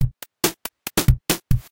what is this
Analog Beats
analog drum loop using white noise
analog, beat, dirty, drum, hat, hi, kick, loop, noise, old, school, snare, white